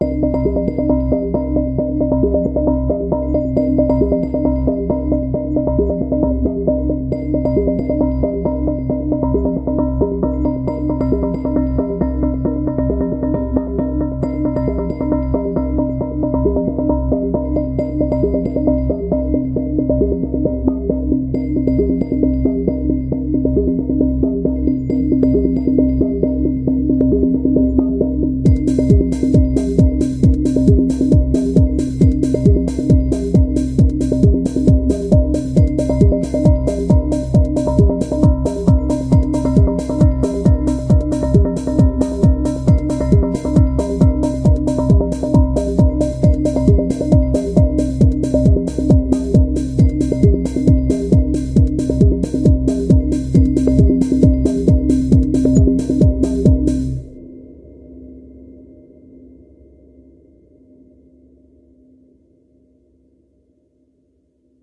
Filtered Bells with drums